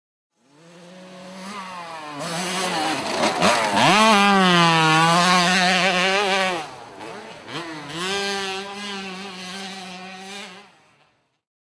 KTM65cc-jump-turn-turn
ktm65 jumping into to turn on mx track
ktm, motorbike, motorcycle, 65cc